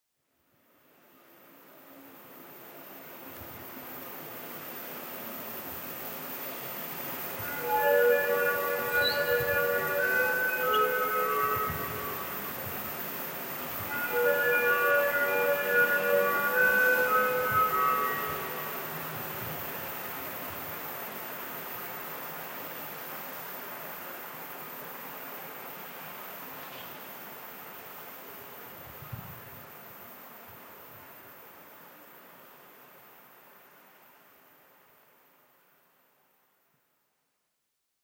hemglass horn
This is a sound of Sweden, "hemglass" is what you should think everytime you hear it.
city, icecream-bell, mist, horn, urban